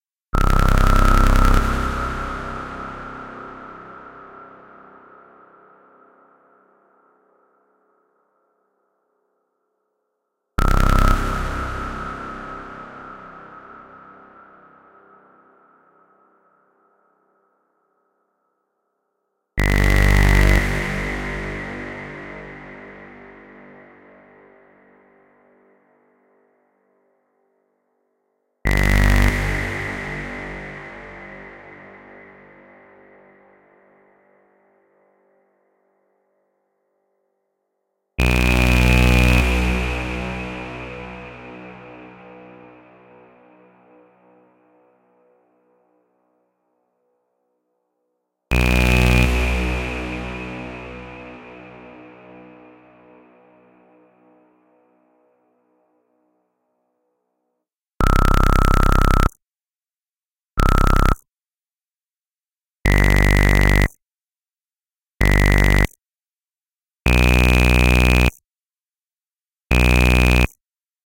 2 lengths of 3 different pitches of this low cinematic squelch bass. Played once with a large reverb and again played dry with no fx. This sound is modeled after the Inception movie's large horn blast. It is a low multi-voiced Saw wave with a specific ring modulation setting to give it it's effect.
Made with Native Instrument's Massive inside Ableton Live 9.
Ambience, Ambient, Atmosphere, Bass, Big, Blast, Cinematic, Dark, Distorted, Drone, Film, Free, Honk, Horns, Inception, Large, Loud, Low, Massive, Movie, Power, Powerful, Public, Rattle, Reverb, Ringmod, Squelch